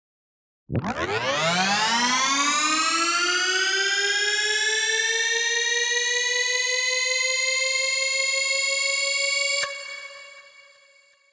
Alien Engine 6
A collection of Science Fiction sounds that reflect Alien spacecraft and strange engine noises. The majority of these noises have a rise and fall to them as if taking off and landing. I hope you like these as much as I enjoyed experimenting with them.
Space, Alien, UFO, Mechanical, Noise, Futuristic, Futuristic-Machines, Spacecraft, Landing, Sci-fi, Take-off, Electronic